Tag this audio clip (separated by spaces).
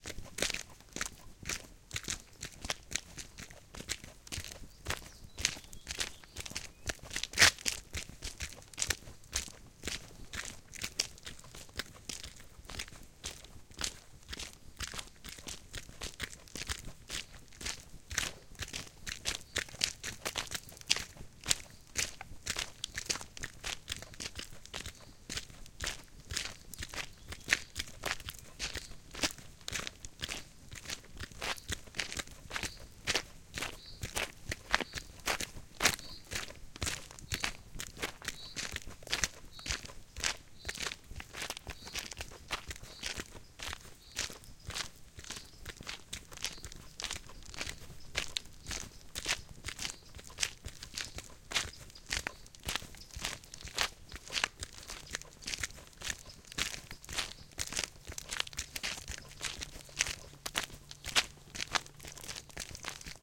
female yorkshire gravel field-recording male footsteps